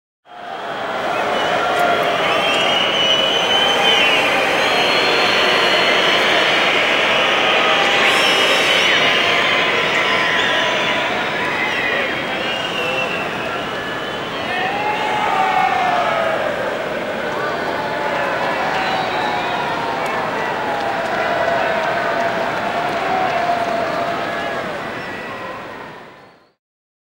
Urheilukilpailut, yleisurheilu, yleisö viheltää / Large crowd whistling, sports competition, athletics, audience, whistle, disappointed shouting
Yleisön vihellyskonsertti stadionilla. Vihellys, pettynyttä huutoa. (EM 1994, Helsinki).
Paikka/Place: Suomi / Finland / Helsinki, Olympiastadion
Aika/Date: 07.08.1994
Athletics, Audience, Competition, Crowd, Field-Recording, Finland, Finnish-Broadcasting-Company, Huuto, Ihmiset, Ihmisjoukko, Katsomo, Kilpailut, Kisat, People, Soundfx, Sports, Stadion, Stadium, Suomi, Tehosteet, Urheilu, Urheilukilpailut, Urheilukisat, Vihellys, Whistle, Yle, Yleisradio, Yleisurheilu